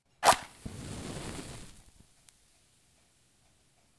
match strike
Striking and lighting a wooden match. Recorded with the Zoom H4's on-board microphone.